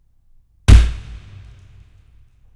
Balloon popping
Balloon pooping in a enclosed space.
Processed with a lower pitch and some reverb.